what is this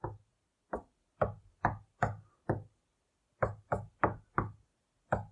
Sound Originally used for: Curly Reads: Lost Twin - The Game [Creepypasta]
Recorded with a Iphone SE and edited in Audacity
curly-one, knock-wall, impact, thump, curlyone, callum-hayler-magenis, knock-knock, knock, wall-knock, callum